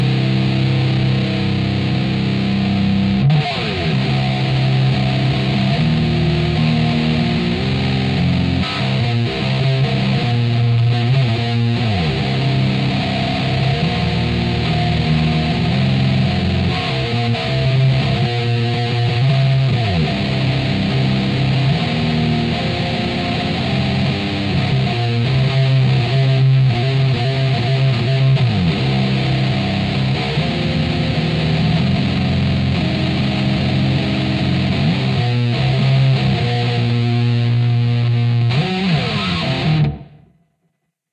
Heavy Guitar Theme
A heavy guitar rock jamming music theme. Maybe someone can find creative use for it?
amplifier, distortion, electric, guitar, hard, heavy, jam, melody, metal, music, overdrive, pedal, rock, song, stoner, theme